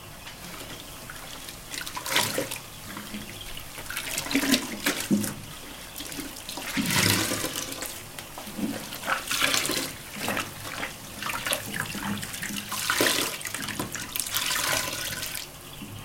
Take out the laundry from the washing machine. Wring a soap solution.